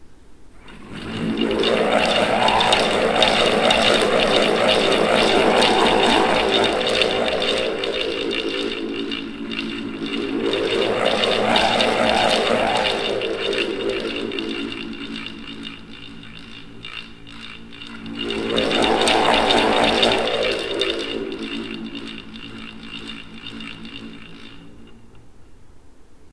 A "Wind Wand" from folk instrument company Lark in the Morning (a kind of bullroarer consisting of a kind of mast supporting several large rubber bands which is swung around the head).
air, band, blades, bullroarer, fan, propeller, rotate, rotor, rubber, turbine